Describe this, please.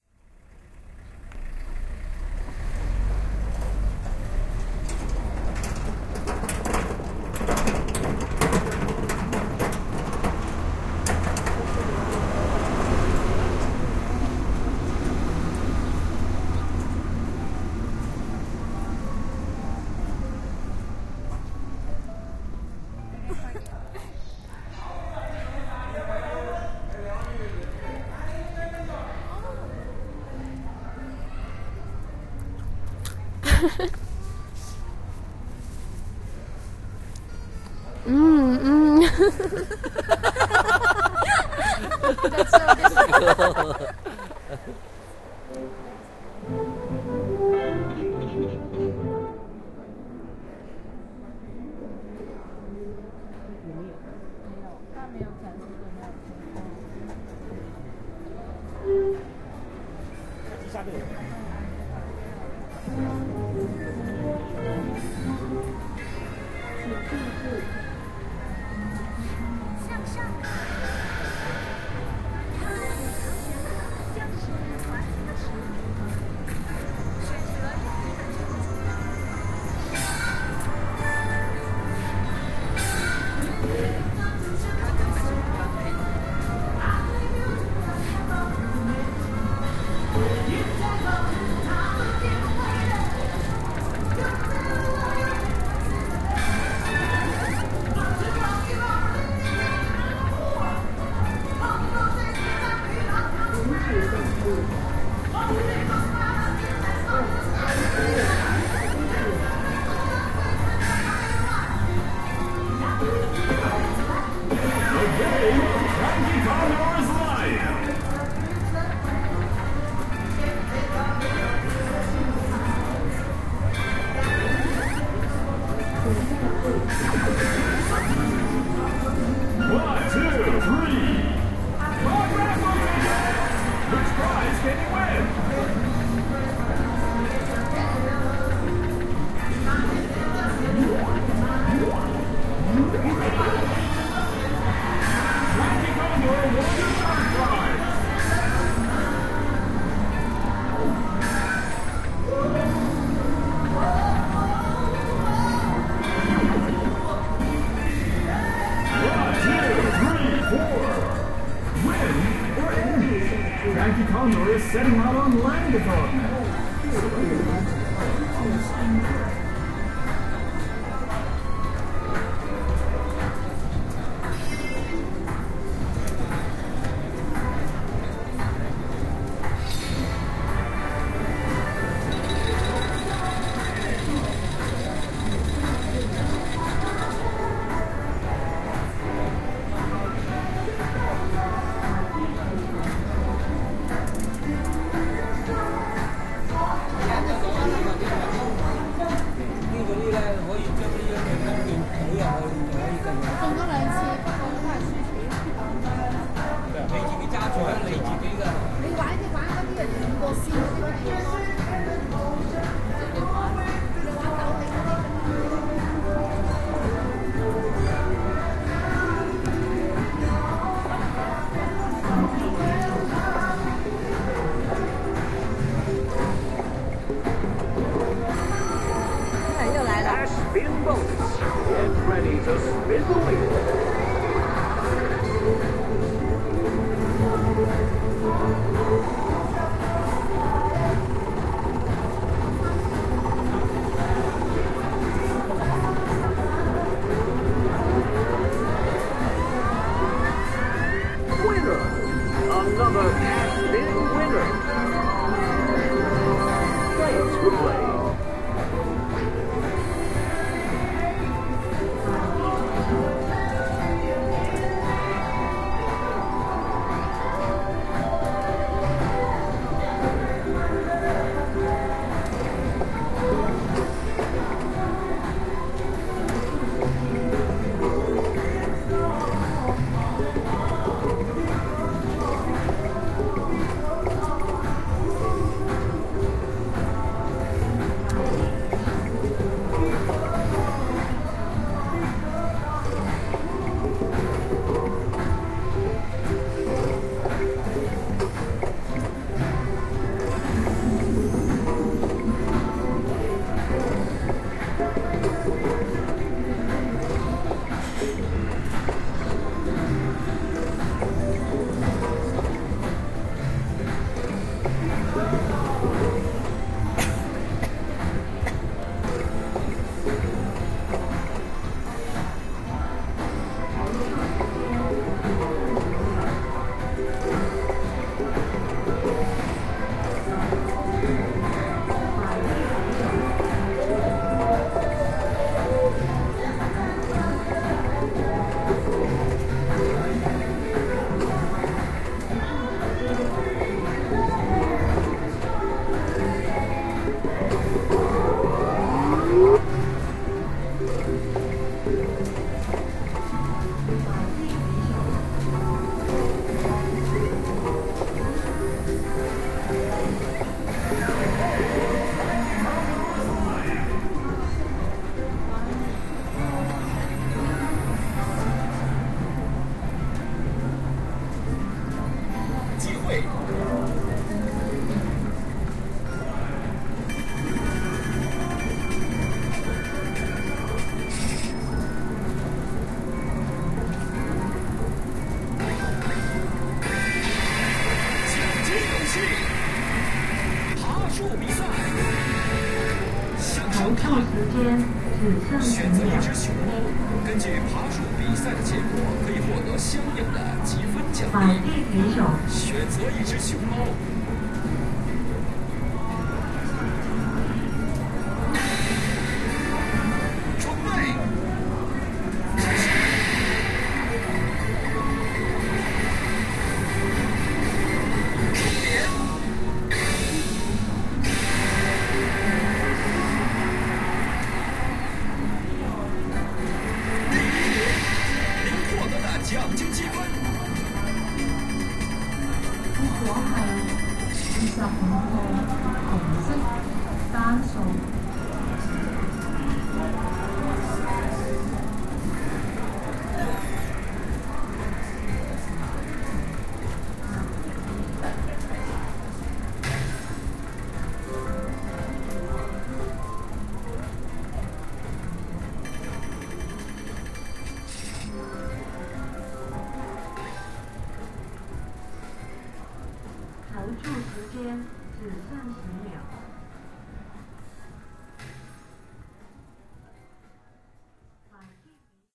Going to Sands Casino in Macao
Field Recording for the Digital Audio Recording and Production Systems class at the University of Saint Joseph - Macao, China.
The Students conducting the recording session were: Inah Quejano; Sazuki Sou; Rebeca Ng; André Cortesão; Rui da Silva
bell, Casino, Celebrating, field-recording, Games, Macao, machines, ring, Roulette, slot, soundscape